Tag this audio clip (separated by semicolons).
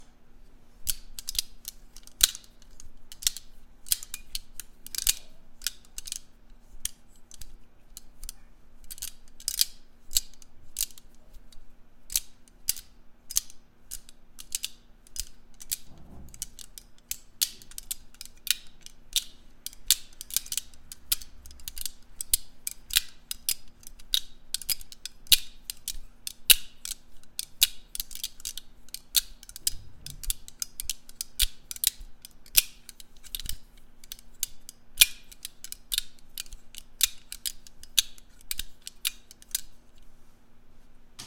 clang; steel